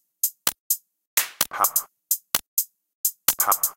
Simple percussion loop with a "ha" sample.
clap, hi-hat, laugh, loop, percussion, snare